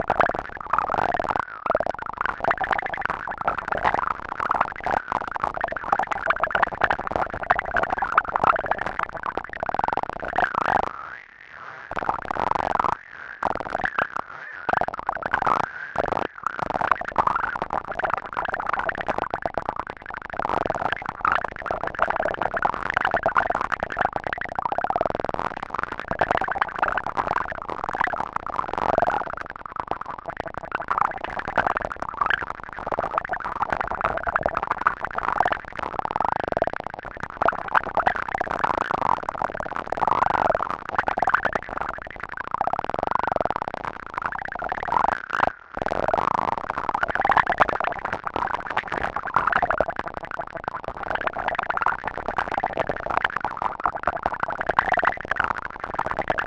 LIQUID PLASTIC
sound created using a sampler and effects